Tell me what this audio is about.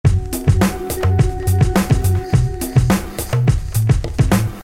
i cut this 4 bar from my own song. i don't remember the bpm.
4, bar, bass, beat, corporation, drum, loop, lounge, sample, thievery, vocal